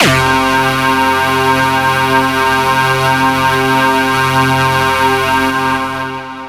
SemiQ leads 6.
This sound belongs to a mini pack sounds could be used for rave or nuerofunk genres
machine, electric, electronic, soundesign, sound-design, artificial, experimental, synthesizer, sfx, digital, glitch, effect, noise, sound, freaky, weird, abstract, strange, loop, lo-fi, sounddesign, intros, future, soundeffect, synth, sci-fi, fx